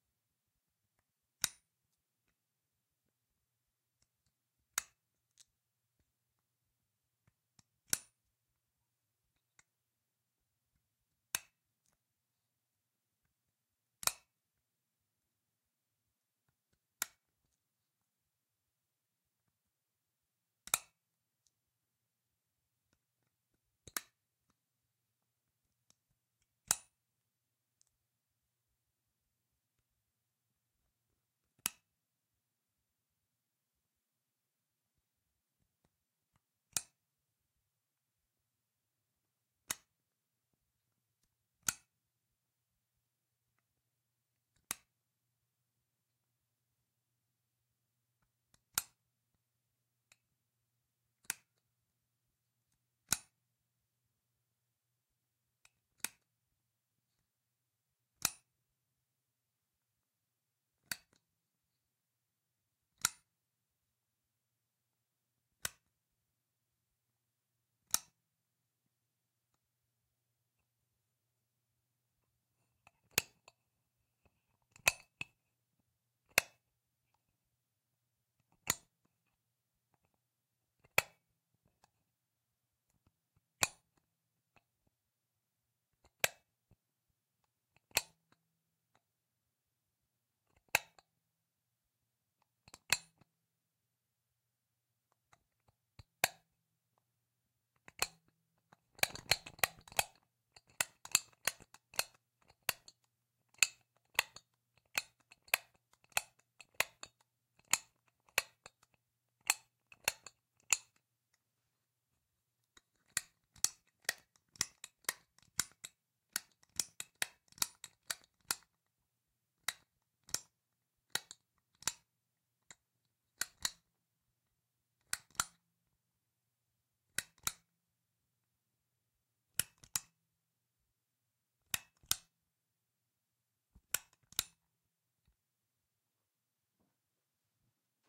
Worklight Switch
Operating the switch on an aluminum work light. Recorded with an SM57. Some gate added (hopefully not too much) to eliminate room noise.